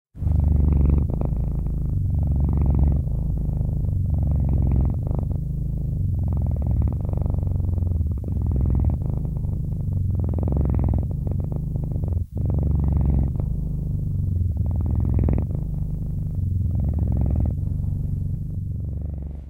A variation on the other purring cat in my collection.
purring, cat